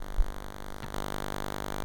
Electric buzz sound